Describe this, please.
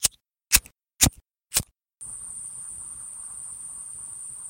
Disposable Lighter (Ignites and Hold Loop)
A collection of disposable lighter sounds - 4 ignitions, and a 2-second loop of gas.